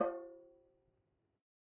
Metal Timbale right open 007
real, god, conga, open, home, trash, record